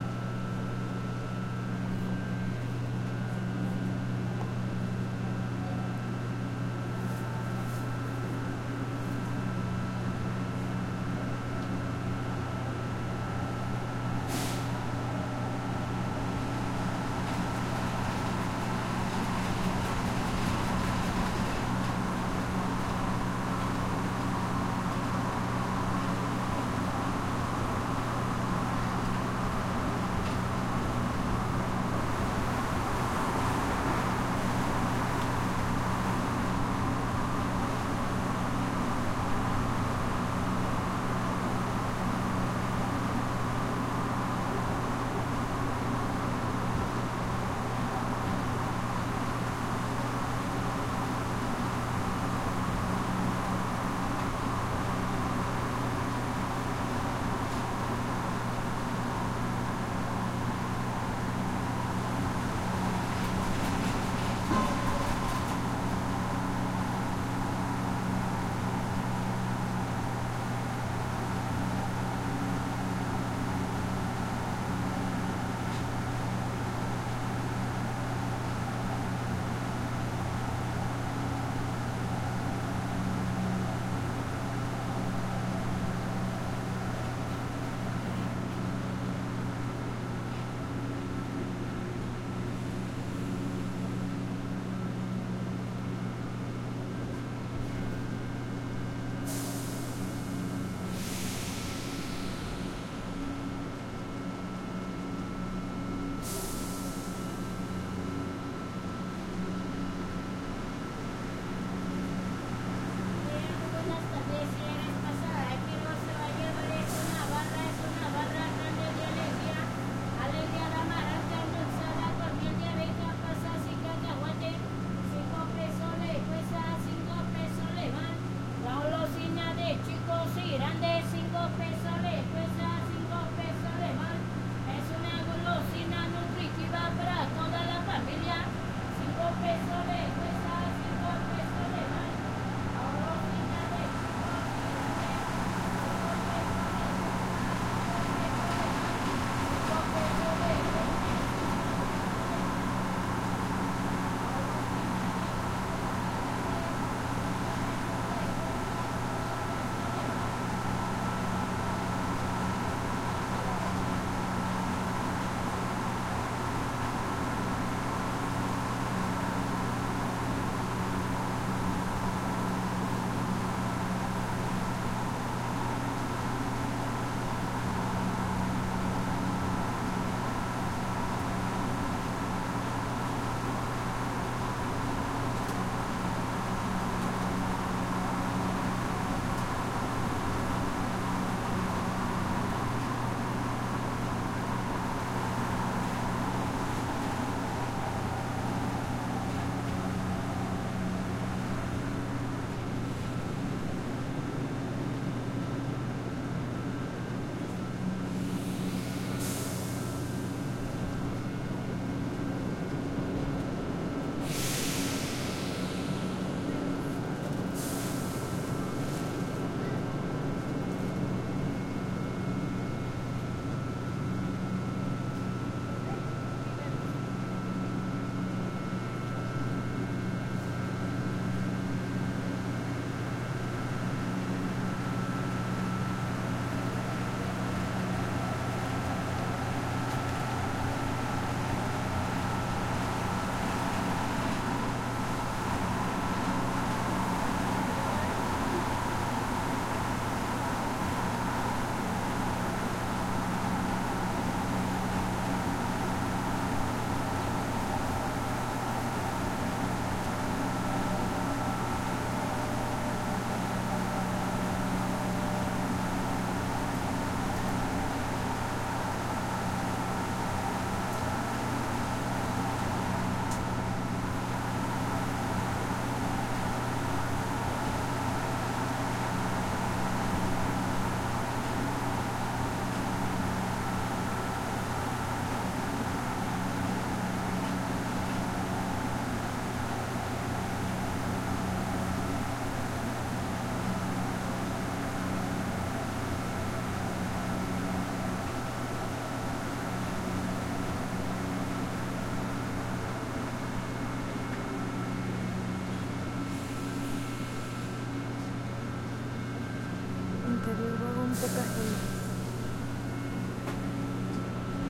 metro 04 - in the train, a seller
seller, in the train, air, subway ambient
ambiance, CDMX, city, field-recording, spanish, subway, train